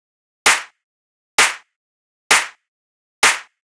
Snare Clap Loop 1
Tightly EQ'd and layered snare-clap loop with a pronounced transient.
[BPM: 130]
[Key: Noise]
130, 4x4, Ableton, BPM, Clap, Clap-Loop, Clean, EDM, Electro, Heavy, Loop, Percussion, Sharp, Snare, Snare-Clap, Snare-Loop, Stereo, Thick, Wide